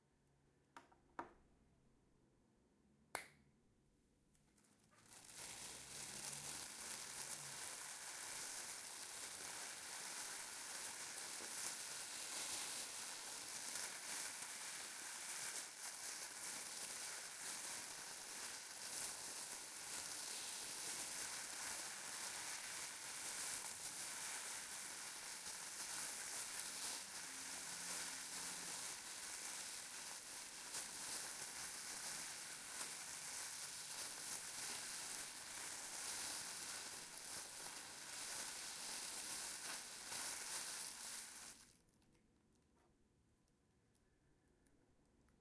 plastic bag rain white noise
raw recording of plastic bag being fumbled, some nice natural phasing action going on